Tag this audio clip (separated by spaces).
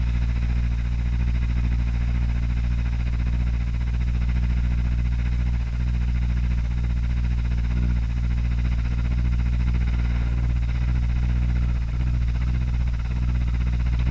rumble motor revving engine